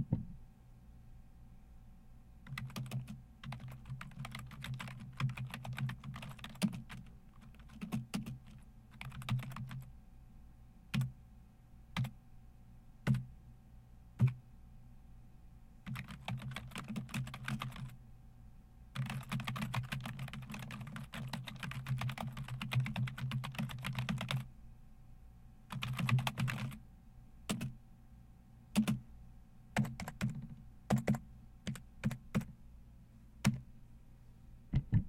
Heavy and fast typing on a keyboard